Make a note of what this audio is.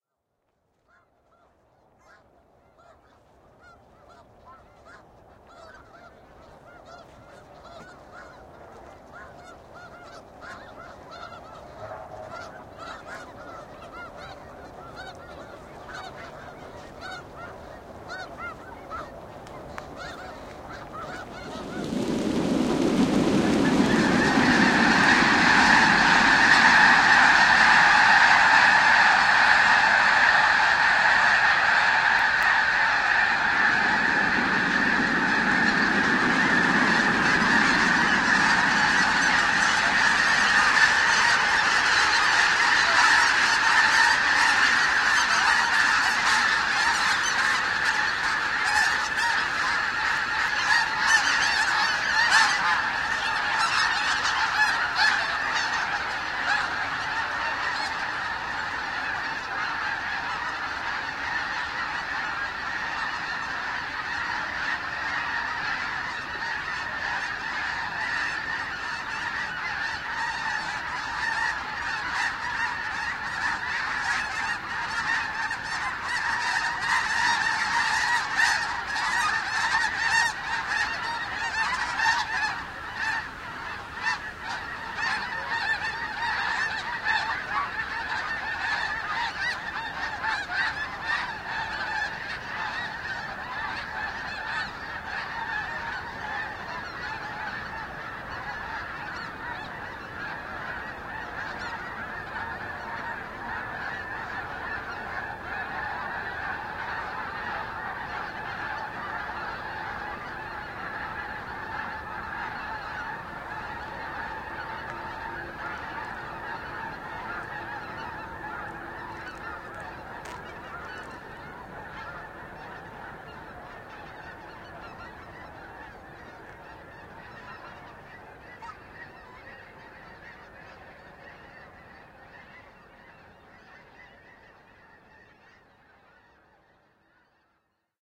geese launching 17feb2010
Recorded February 17th, 2010, just after sunset.